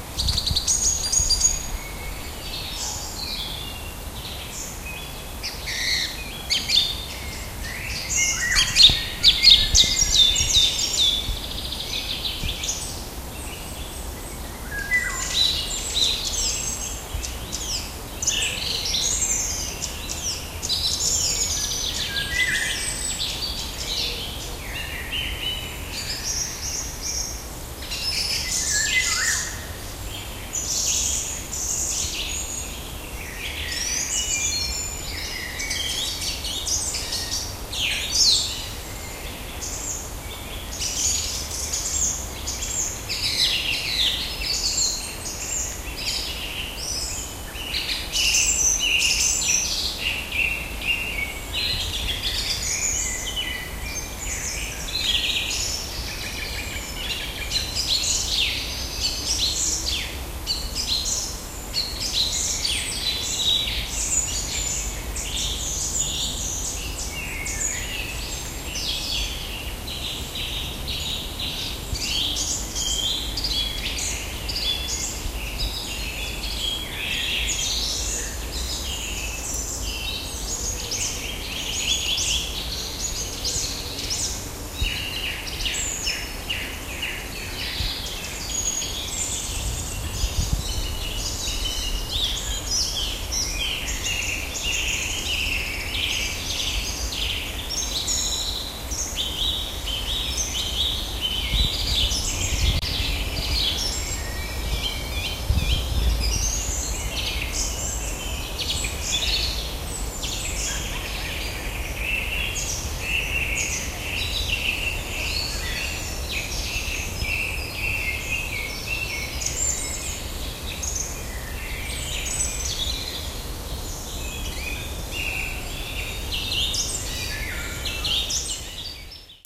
birds unprocessed
Complete backyard recording of a blackbird, unprocessed.
ambient, backyard, bird, birds, blackbird, field-recording